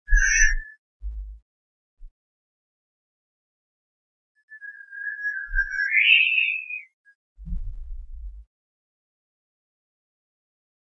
JK Einsteinium
High frequency musical whistle like sound, processed.
musical, noise, whistle, high-frequency